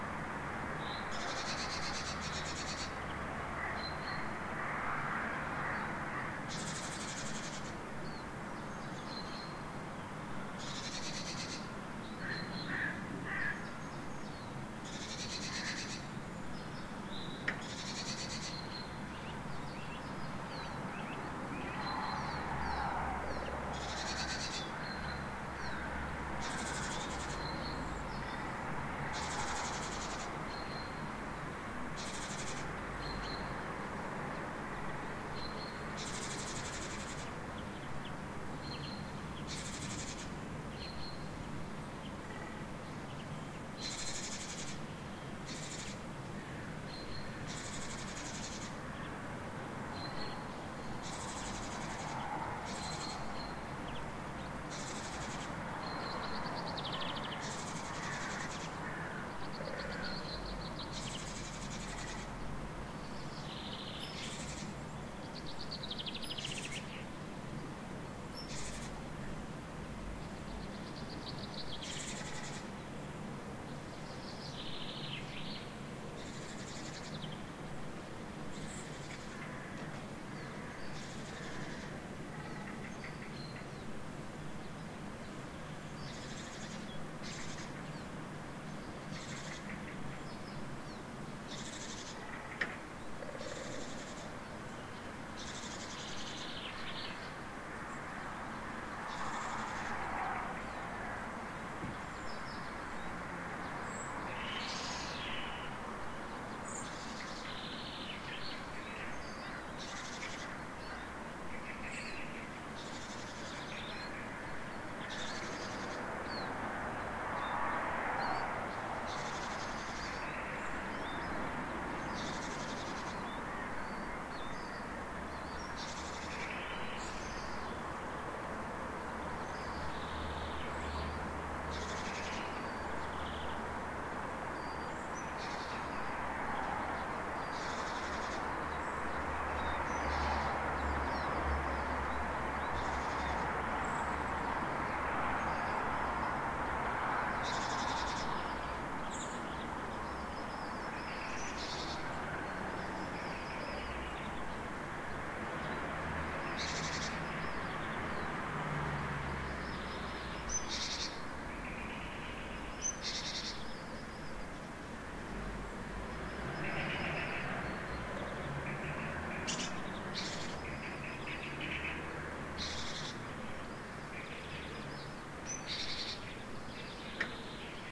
garden02 05 (Surround R)
Recorded with Zoom H2 at 7:30 am. Near street-noice with several birds